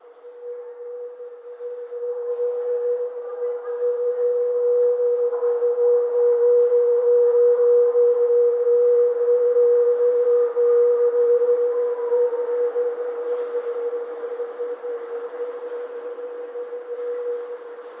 Aigu ligne 9 filtered
This is taken from (and an answer to) Mxsmanic's "Trip on Métro Line 9 in Paris, France", at 2:20 exactly: metro on rails through the tunnel, which resonance creates this sound (very familiar to me as I live here).
483, 483hz, 9, answer, city, frequency, hz, ligne, line, metro, mxsmanic, paris, subway, tube